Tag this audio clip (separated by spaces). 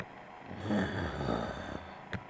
creature growl zombie demon monster